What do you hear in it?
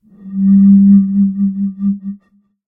Bottle blown 09
Some sounds of blowing across the top of a glass bottle.
Specifically a 33cl cider bottle.:-)
Captured using a Rode NT5 small-diaphragm condenser microphone and a Zoom H5 recorder.
Basic editing in ocenaudio, also applied some slight de-reverberation.
I intend to record a proper version later on, including different articulations at various pitches. But that may take a while.
In the meanwhile these samples might be useful for some sound design.
One more thing.
It's always nice to hear back from you.
What projects did you use these sounds for?
33cl, air, blow, blowing, blown, bottle, building-block, closed-end, columns, glass, one-shot, recording, resonance, resonant, sample-pack, samples, tone